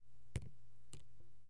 Water On Paper 13
Drops on paper.
drip, water, paper, drop, drops